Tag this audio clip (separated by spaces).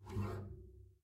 Acoustic Bass Double Instrument Plucked Standup Stereo Upright